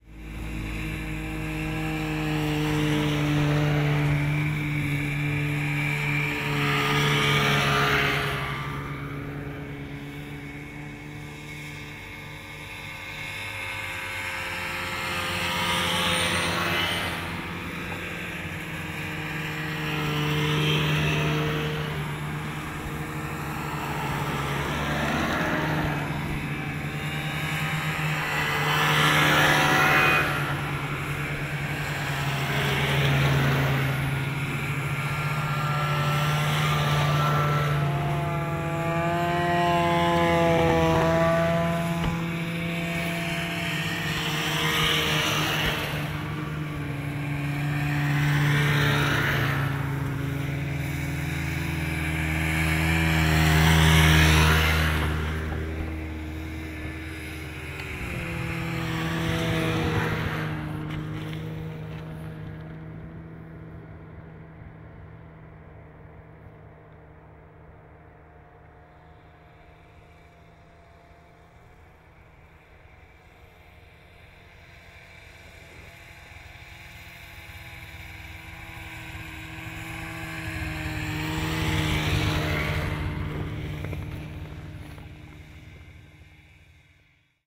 snowmobiles pass by various